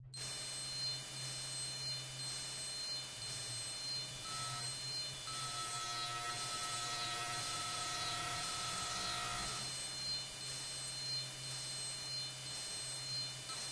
A few high quality ambient/space sounds to start.
ambient, fx, space